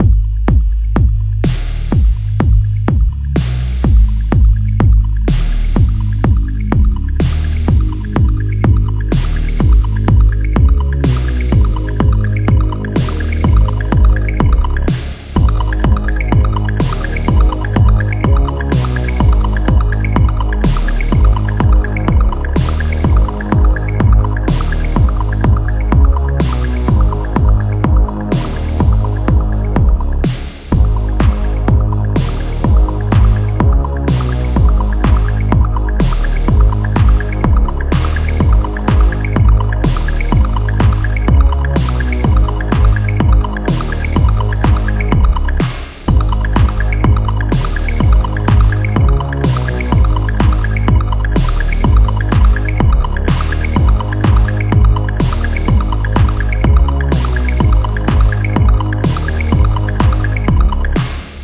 Electric Dreams Loop [Electro] (125 BPM G Sharp Minor)
This is a loop created using FM8, Serum and Battery. Not completely sure what genre to class this sloop as but gone for Electro.
G-Sharp-Minor, Minor